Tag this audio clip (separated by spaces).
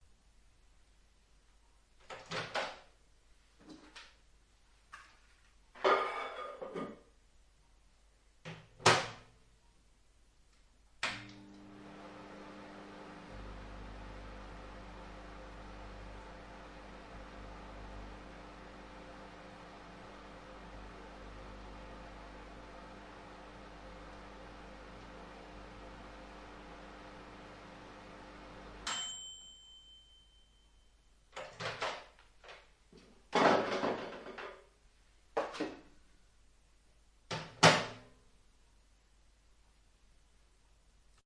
cooking food heating household kitchen meal microwave